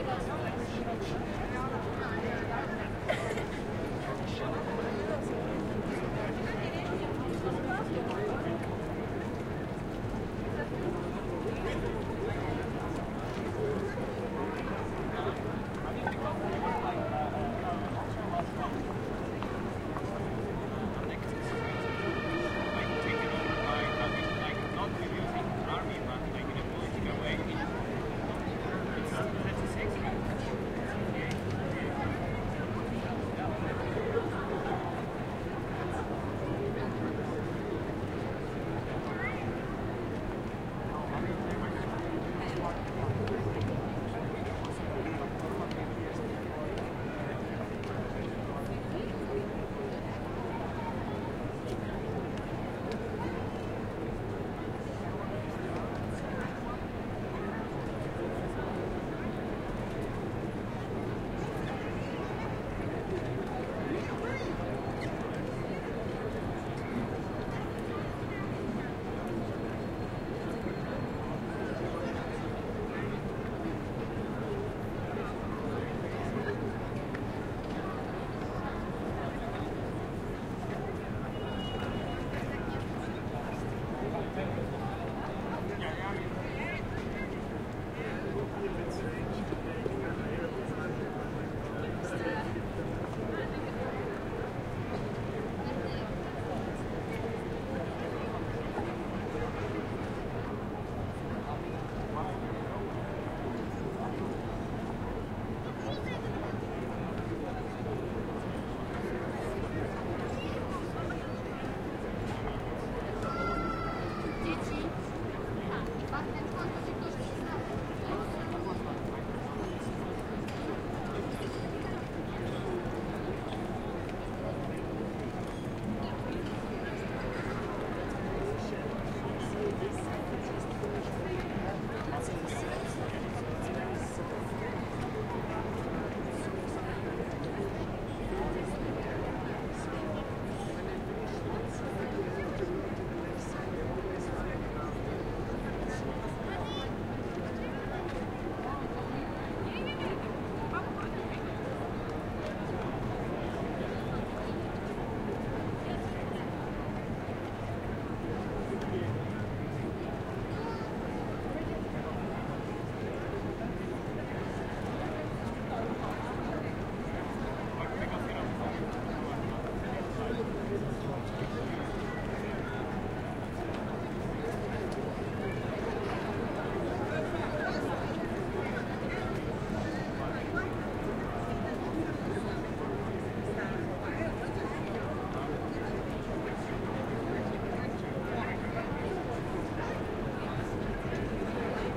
ambience Vienna Stephansplatz
Ambience recording from the Stephansplatz in Vienna, Austria.
Recorded with the Zoom H4n.